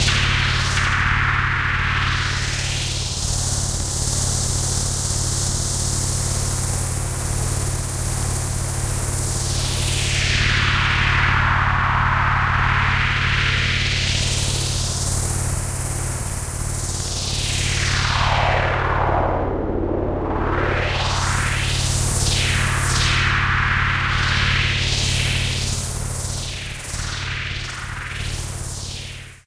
stereo sweeping filter over noise waveforms
electro, film, backgroung, illbient, score, experiment, sci-fi, drone, sweep, strange, ambient, texture, atmosphere, noise, ambience, weird, soundscape, soundeffect, fx, soundtrack, suspence, pad